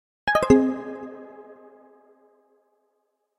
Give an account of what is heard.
Generic unspecific arftificial sound effect that can be used in games to indicate some action was sucessfully fulfilled
effect, game, jingle, sucessful